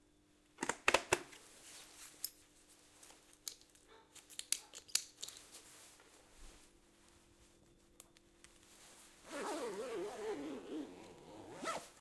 13FMikovaA helma bunda
Dress biker outfit